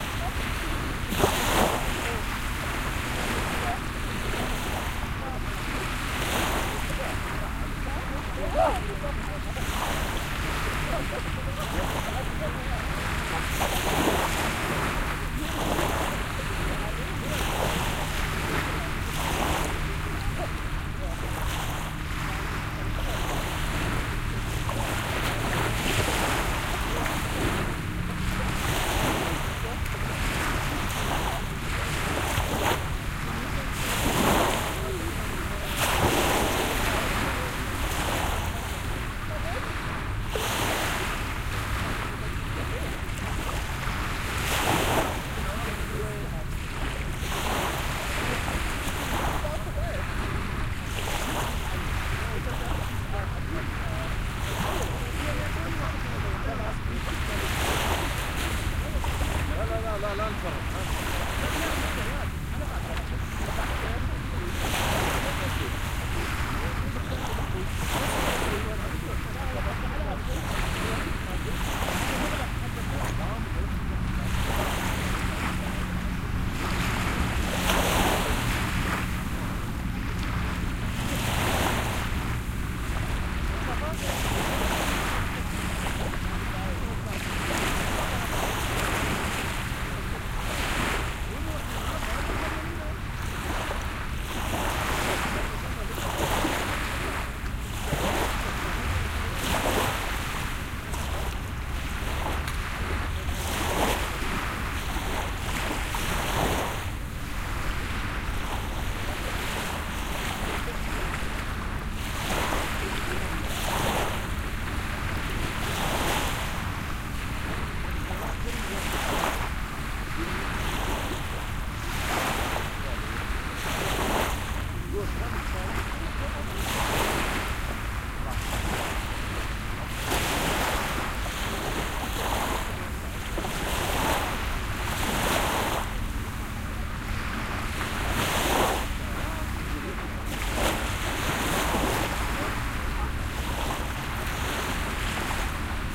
abudhabi beach
I am sitting a the citybeach of Abu Dhabi. waves and people...
shore, field-recording, water, ocean, waves, coast, beach, binaural, wave, sea, seaside